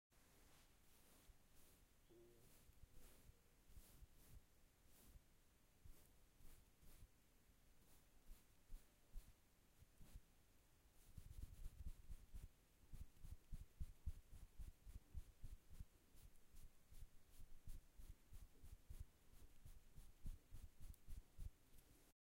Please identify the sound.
Make up brush on skin
A large powder brush being used on skin. Recorded using Zoom H6 with an XY capsule.
Skin, Make-up